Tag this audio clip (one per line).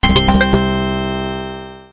application
bleep
blip
bootup
click
clicks
desktop
effect
event
game
intro
intros
sfx
sound
startup